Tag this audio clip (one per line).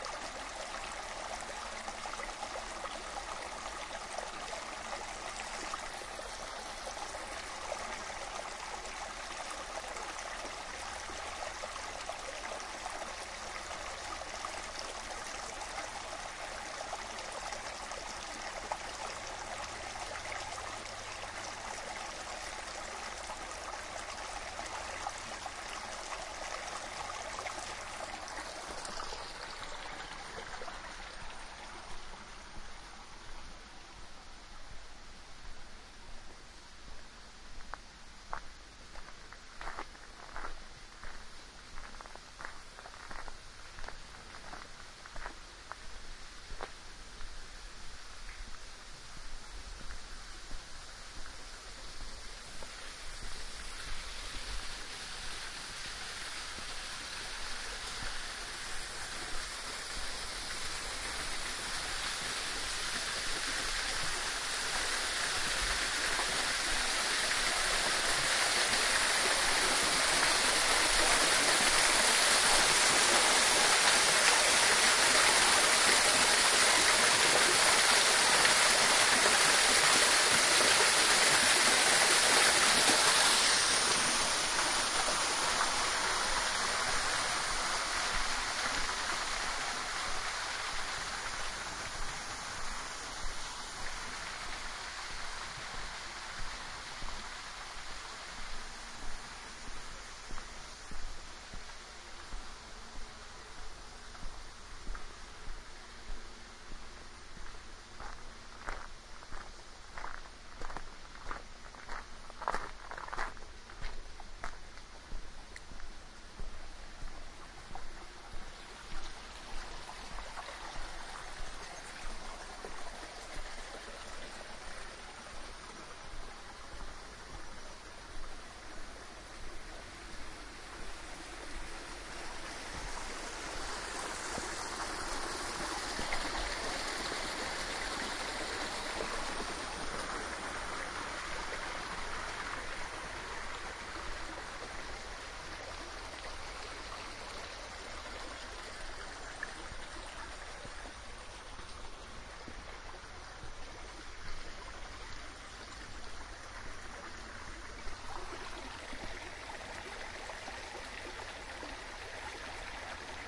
burbling
field-recording
waterfall
stream
binaural
water-fall
water
foot-steps
bubbling
australia
nature